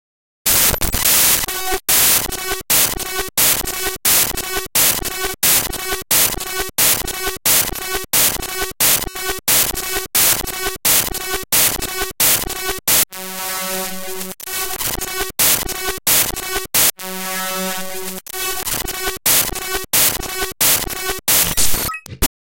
Glitch Noise 6
These are glitch sounds I made through a technique called "databending." Basically I opened several pictures in Audacity, and forced it to play them as sound files.
digital, distortion, glitch, harsh, lo-fi, noise